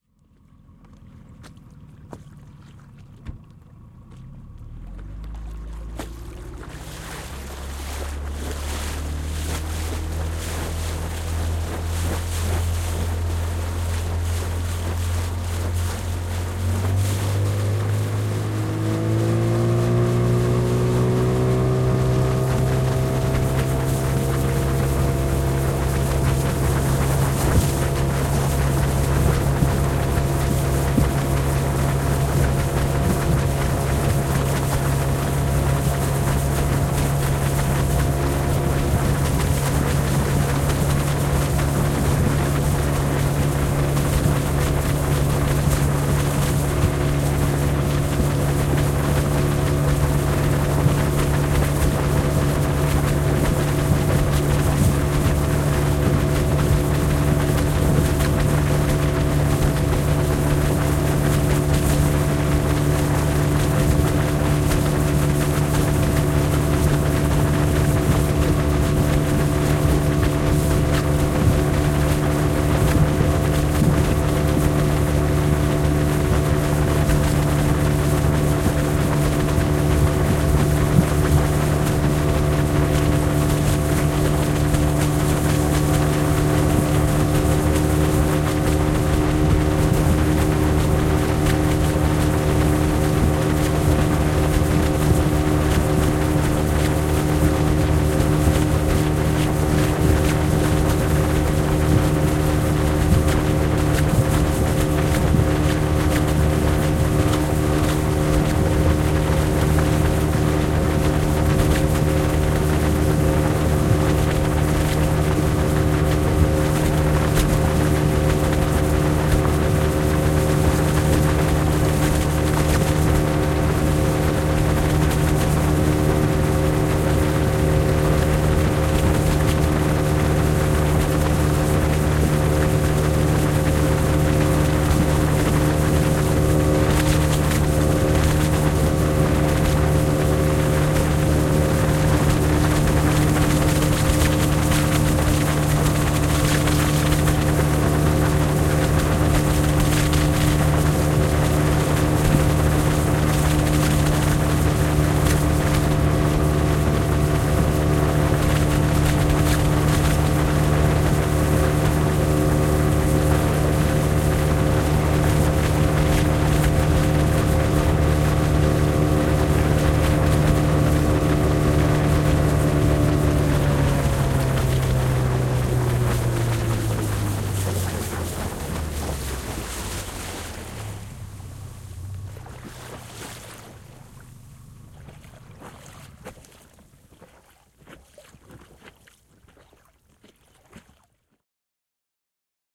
Moottorivene, kumivene, ajoa / Rubber boat, motorboat, start, fast running, stopping, waves splatter against the boat, Yamaha 20 h.p. outboard motor
Käynnistys ja kovaa, nopeaa ajoa aallokossa veneen keulassa, läiskettä aaltoja vasten, pysähdys, moottori sammuu. Yamaha, 20 hv perämoottori.
Paikka/Place: Suomi / Finland / Pori
Aika/Date: 30.07.1993
Boat, Boats, Dinghy, Field-recording, Finland, Finnish-Broadcasting-Company, Kumivene, Moottorivene, Motorboat, Outboard-engine, Rubber-boat, Soundfx, Suomi, Tehosteet, Vene, Veneet, Veneily, Vesiliikenne, Waterborne-traffic, Yle, Yleisradio